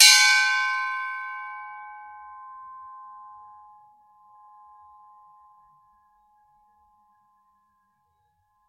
These are sounds made by hitting gas bottles (Helium, Nitrous Oxide, Oxygen etc) in a Hospital in Kent, England.
bottle, percussion, metal, hospital